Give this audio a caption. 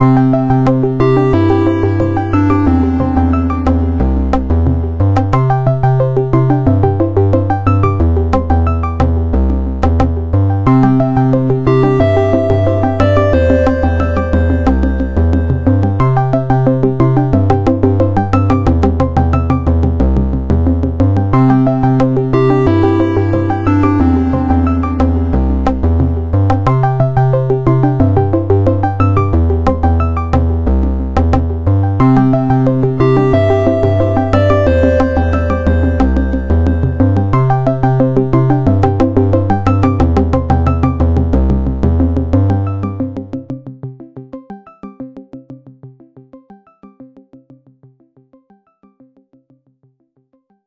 90 bpm ATTACK LOOP 3 melodies mixdown mastered 16 bit
This is a melodic loop created with the Waldorf Attack VSTi within Cubase SX. I used the Analog kit 1 preset to create this loop, but I modified some of the sounds. The key is C majeur. Tempo is 90 BPM.
Length is 16 measures and I added an additional 4 measures for the
delay tails. Mastering was done within Wavelab using TC and Elemental
Audio plugins.
melodic, loop, 90bpm, electro, melodyloop